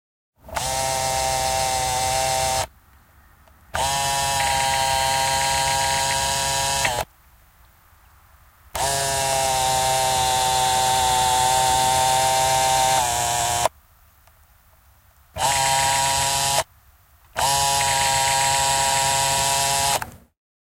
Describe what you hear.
Kaitafilmikamera, zoomaus / Film camera, home movie camera, zoom, zooming, various takes, exterior, a close sound (Canon, 8 mm)
Kaitafilmikameran zoomauksia lähellä, muutama versio. Lähiääni. Ulko. (Canon, 8 mm).
Paikka/Place: Suomi / Finland / Lohja, Retlahti
Aika/Date: 03.09.1998
Camera, Cine-camera, Exterior, Field-Recording, Film-camera, Finland, Finnish-Broadcasting-Company, Home-movie-camera, Kaitafilmikamera, Kamera, Soundfx, Suomi, Tehosteet, Yle, Yleisradio, Zoom, Zoomata, Zoomaus, Zoomi, Zooming